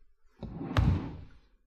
Wooden chair 5
Moving a wooden chair on a wooden floor.
{"fr":"Chaise en bois 5","desc":"Déplacement d'une chaise en bois sur du parquet.","tags":"chaise bois meuble bouger déplacer"}
table, moving, furniture, push, chair, wooden, wood